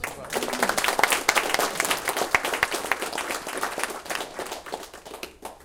group applause1
applause people funny humor human joke story
A group of people applauds. These are people from my company, who listen story about one of them.
Recorded 2012-09-28.
AB-stereo
applause, funny, human, humor, joke, people, story